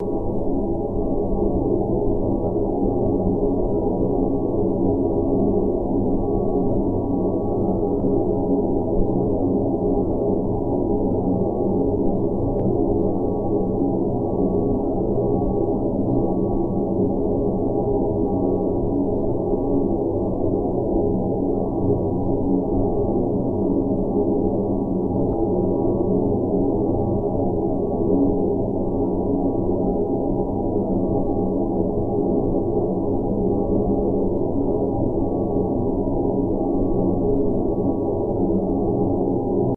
This is a sound I think I recorded at my bathroom-fan. Sounds like something that could fit as ambiance in a tunnel.